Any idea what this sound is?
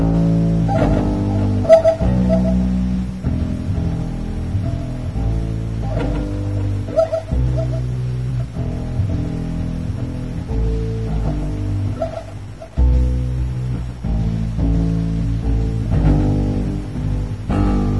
Pinko(slower+delay)
what grungey weirdstuff